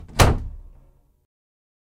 Door Close 4
Wooden Door Closing Slamming
closing, door, slamming, wooden